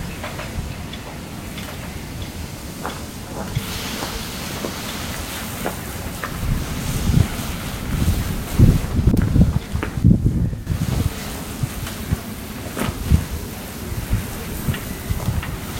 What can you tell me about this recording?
Wind slapping rigging against ship masts, tools running in the distance. Water from hose washing boats. Foot falls on gravel.
Recorded at Fambridge Yacht Haven, Essex using a Canon D550 camera.